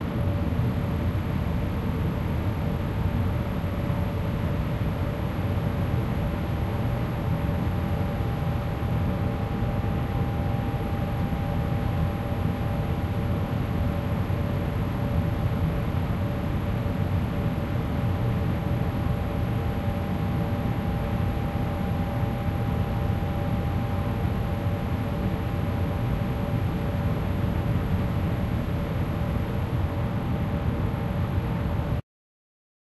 Ho! Ho! Ho! Merry Christmas!
This sound had been recorded in the former printing facility of Pravda newspaper in Moscow. Once used to print the government's official daily newspaper for the entirety of USSR (imagine the size of this facility!), it's currently densely populated by various underground and not-so-underground shops, recording studios, rehearsal rooms for dancers and rock/metal musicians (that's why I've been there) and photo parlours. I saw the empty corridor, heard the hum and approached its source with Tascam DR-05. The sound is edited a bit: the high frequencies are cut off above 3964Hz with bandwith value of 1.49 (I use Reaper and the built-in parametric eq), in order to bring down the irritating squeal spiking at 11.3kHz.
More records will follow